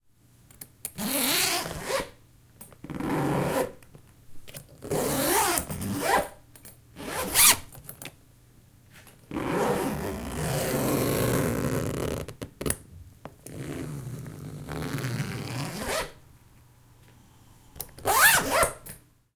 Son d'une fermeture éclair. Son enregistré avec un ZOOM H4N Pro.
Sound of a zip. Sound recorded with a ZOOM H4N Pro.